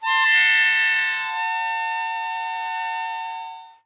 Harmonica recorded in mono with my AKG C214 on my stair case for that oakey timbre.
harmonica, g, key